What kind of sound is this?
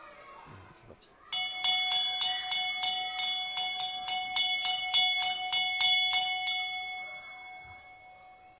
cloche maternelle
This is the ring of the kindergarten.
france, march2015, messac